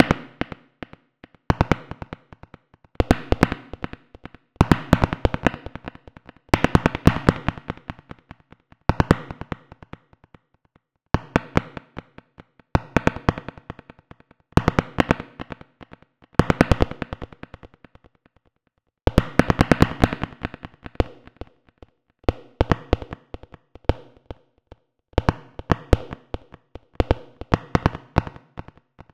Fireworks (generated)
Sound similar to fireworks generated with LMMS.
game, pop, crackle, fireworks, pyrotechnics